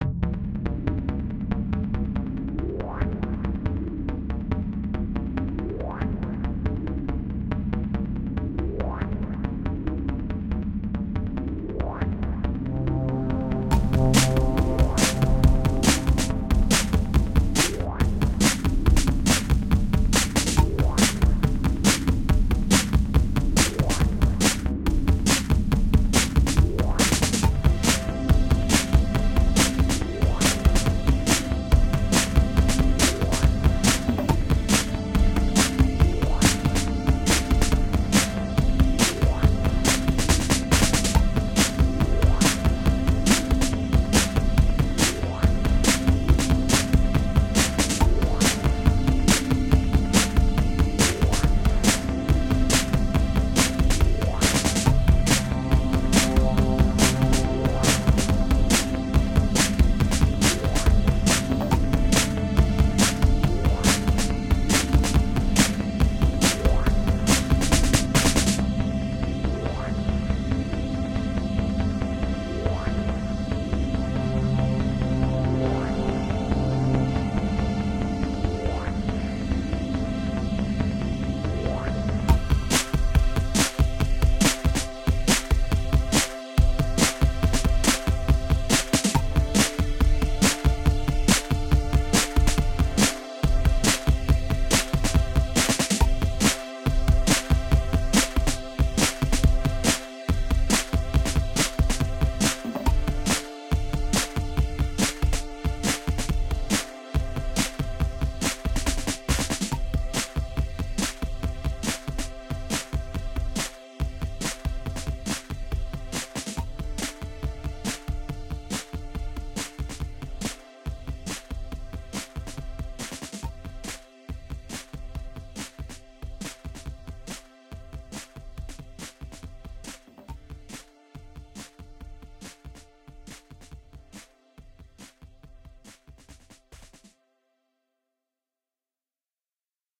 Cazanova Squirt
Cazanova is squirting in all directions, what could I do? Garageband mix
ambient, dance, electro, loop, music, romantic, soundtrack, space, synth, techno, trance